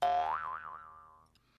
jaw harp5
Jaw harp sound
Recorded using an SM58, Tascam US-1641 and Logic Pro
boing, bounce, doing, funny, harp, jaw, silly, twang